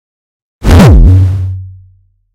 HK noiseOD6
I made this in max/mxp.
bass, distorted, drum, kick, noise, oneshot, overdriven, percussion